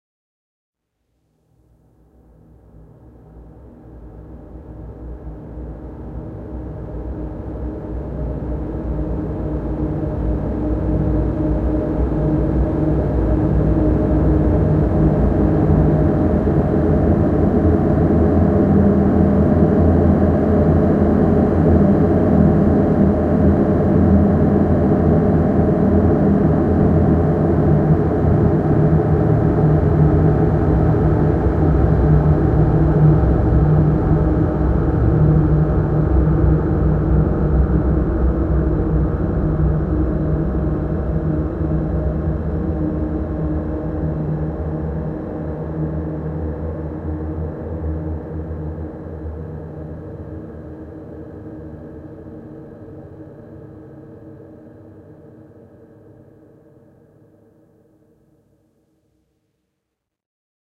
About one and a half minute of beautiful soundescapism created with Etheric Fields v 1.1 from 2MGT. Enjoy!
Electronic, Ambient, Drone